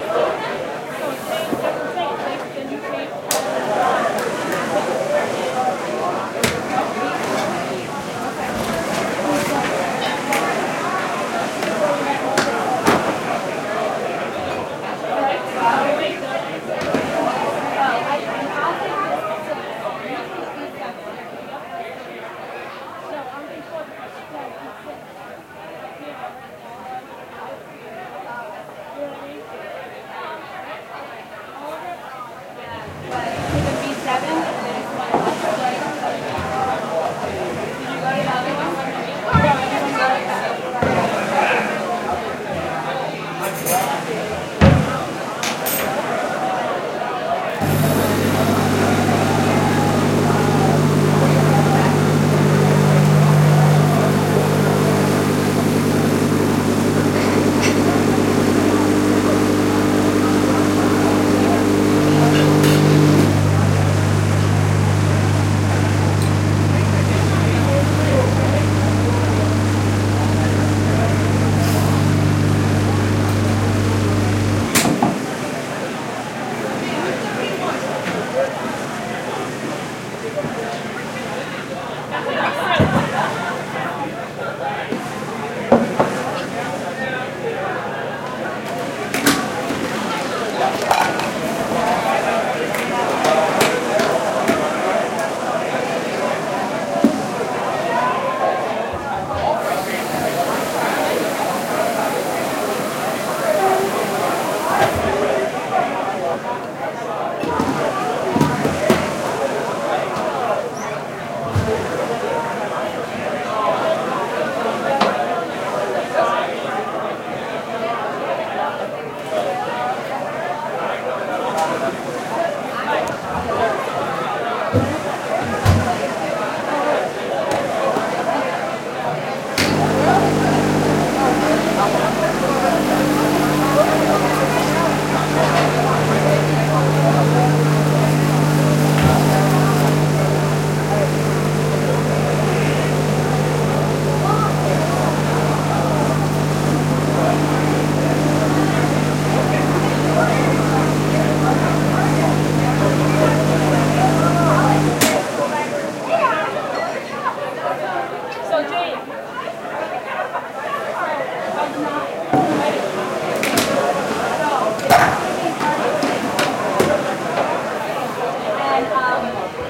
I recorded the sound of making coffee. I textured with the sound of a beach and the voice of people.
Tascam DR-05X
SFX conversion Edited: Adobe + FXs + Textured + Mastered
Music